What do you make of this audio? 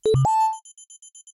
Synthesized version of drone data confirmation sound from Oblivion (2013) movie
Synth: U-HE Zebra
Processing: none